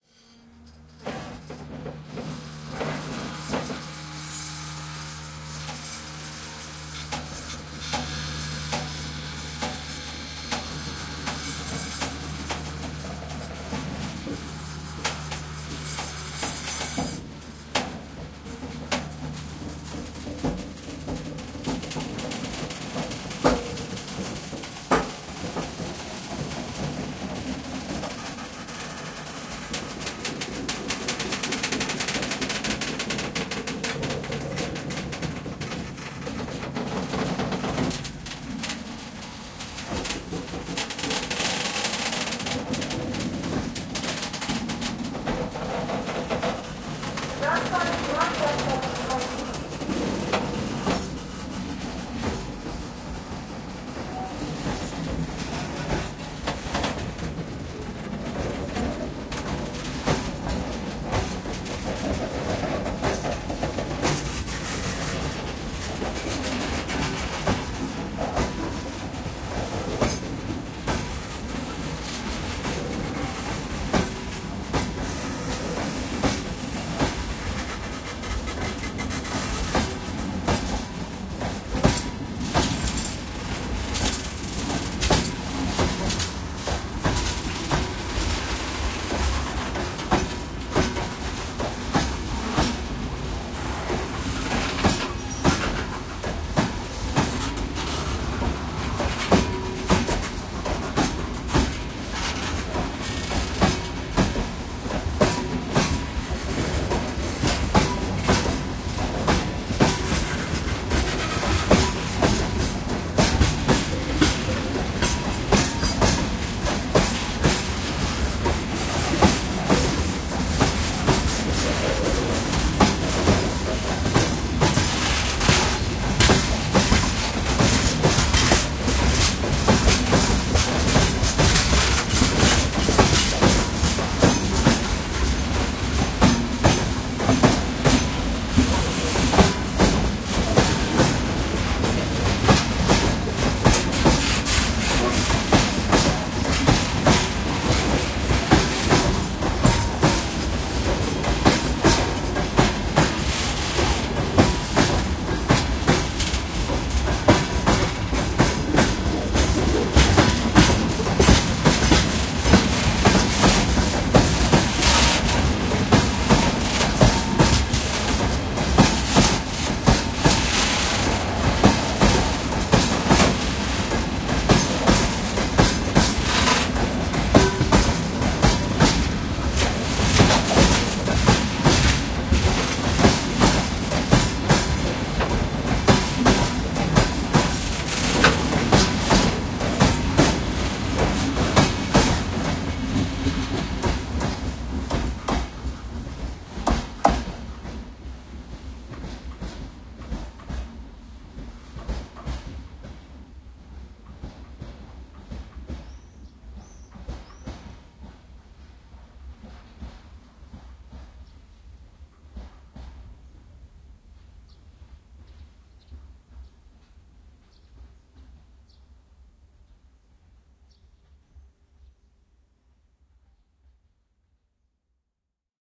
The freight train starts moving and leaves the station.Kosulino station(Eq,cmpr,lmtr)
The freight train starts moving and leaves the station. The train was empty and as it accelerated, it emitted all kinds of squeaks, rattles, mechanical clicks, crunches, squeals, bumps. Some points are very useful for sound design.
Recorded from the platform of the Kosulino station, not far from the city of Ekaterinburg (Russia). Distance: 2 meters. Recorded on Tascam DR-05x. Enjoy it.
If it does not bother you, share links to your work where this sound was used.
Note: audio quality is always better when downloaded.